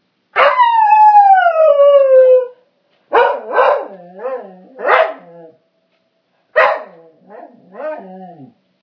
Dog Pitiful Howl and Bark
I recorded my dog barking after I hit a single note on my piano. Recorded using my ipad microphone, sorry for the lack of proper recording. I figured I would just nab it while he was feeling talkative!
pooch, beagle, dog, whine, howl, puppy, nose